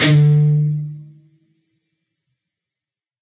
Dist Chr D oct pm
D (4th) string open, G (3rd) string, 7th fret. Down strum. Palm muted.
distorted-guitar, distorted